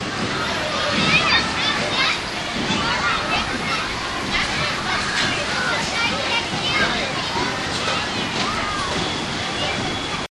newjersey OC wonderlandagain
More Wonderland Pier in Ocean City recorded with DS-40 and edited and Wavoaur.
ambiance, field-recording, ocean-city, wonderland